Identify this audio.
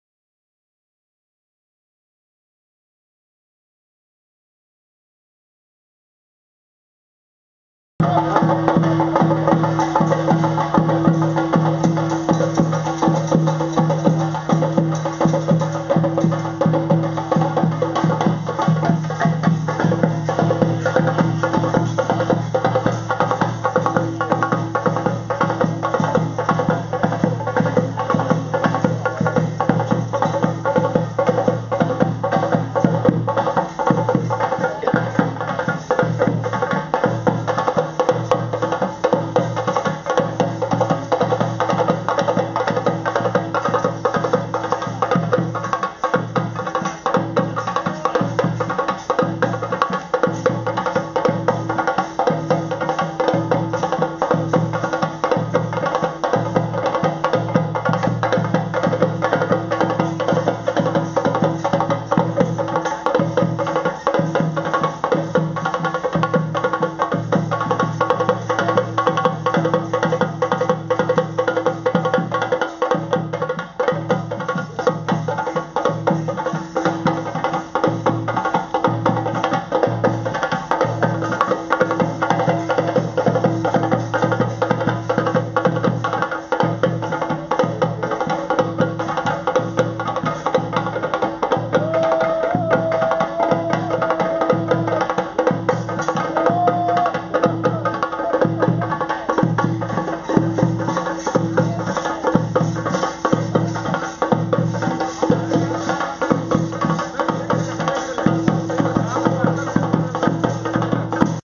3 Theyam dancer begins
Dancer begins his dance
india, kerala, northern, ritual, spirit, theyyam